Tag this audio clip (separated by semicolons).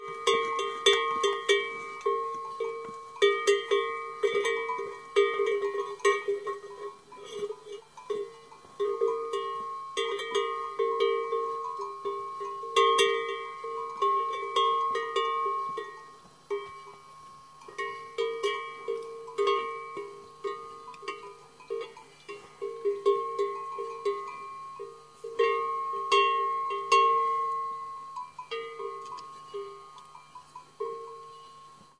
animal; bell; nature; field-recording